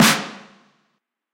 a snare sample I made based off a DM5 and a 707 snare sample as a base alongside lots of processing!